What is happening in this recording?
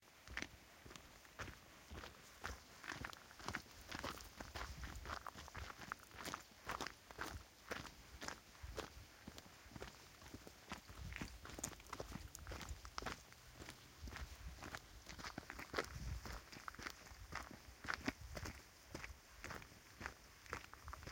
steps on gravel
Steps on mountain gravel, stereo file.
step, grit, steps, gravel, walking, walk, footsteps